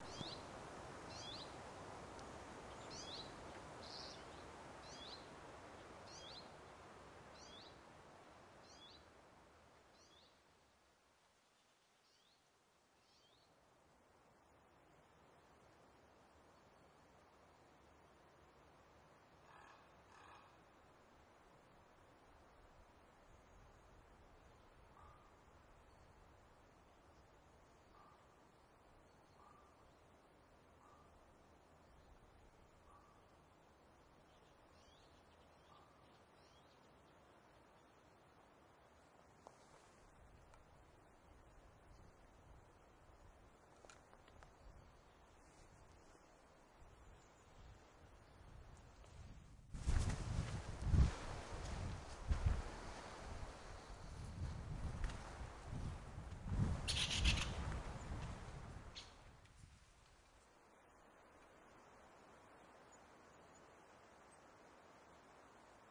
Riga Latvia. Forest sound with rave bird
A rave in the open air museum